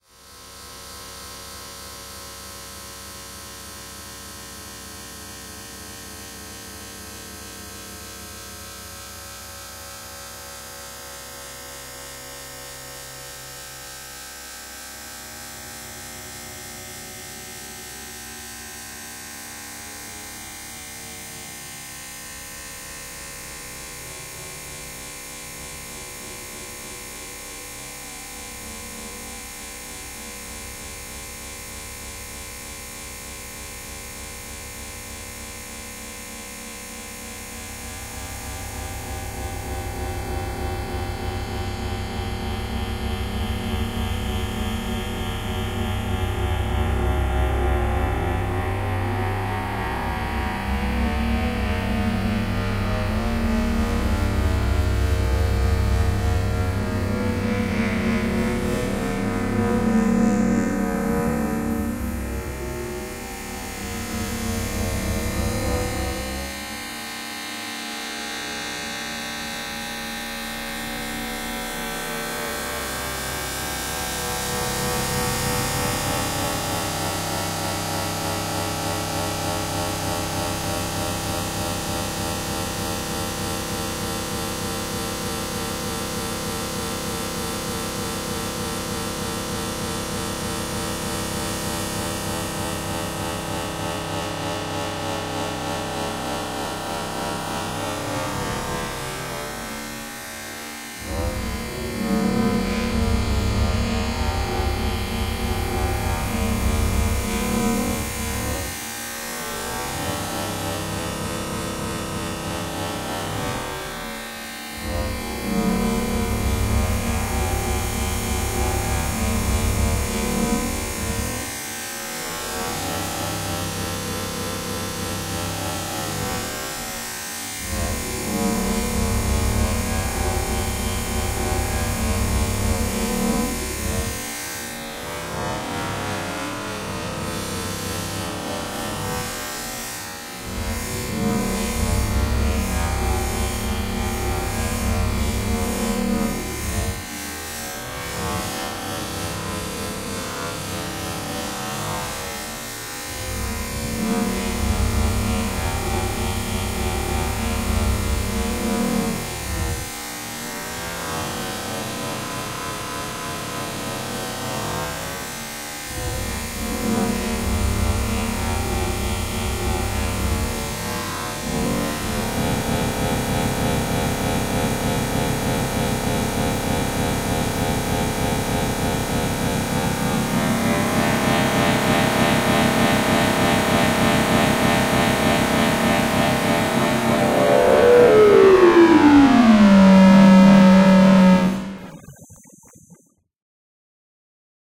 Time Nightmares - 10
Time dilation dilated into concave ambient drone washes.
industrial, ambient, sci-fi, effect, sound, space, synth, digital, ambience, abstract, atonal, spacey, fx, distorted, dark, deep, nightmare, soundeffect, sound-effect, time, sound-design, drone, sfx, future, stretch, atmosphere, horror, pulsating, pulsing, ambiance